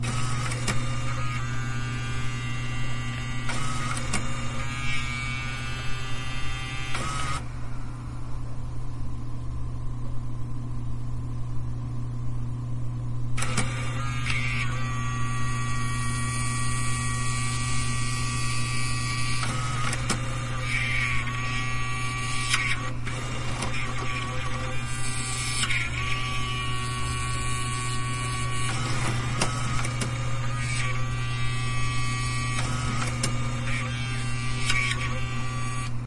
Sound of a Tascam DA-302 attempting to load a DAT tape in the front load slot, worring sounds of stripped mechanisms.
failed, audio, deck, player, transport, tape, digital, cassette